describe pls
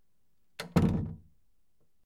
Door Close Fast
Door being shut fast.
fast, door, close, foley